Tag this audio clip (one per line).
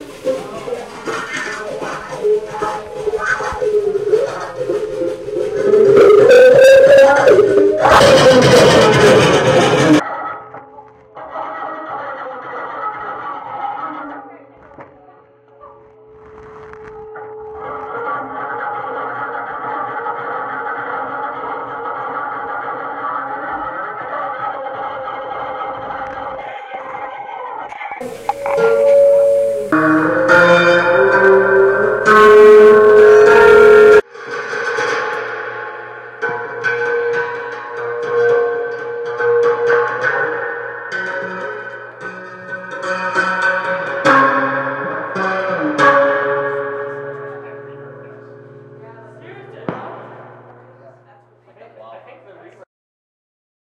futuristic
experimental
artistic